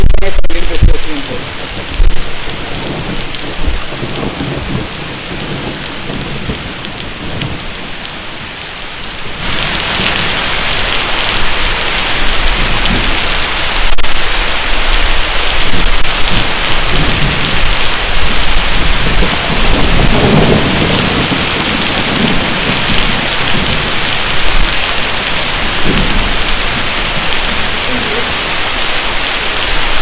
lluvia con truenos, algunas voces en ciertos momentos... storm with somw thunders
nature, storm